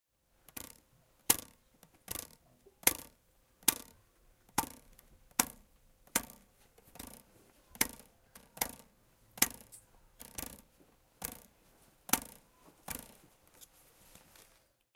mySound HKBE Mehmed
Sounds from objects that are beloved to the participant pupils at 'Het Klaverblad' School, Ghent. The source of the sounds has to be guessed.